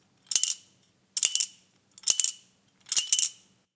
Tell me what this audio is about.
Shaking and playing around with a wine opener or cork screw.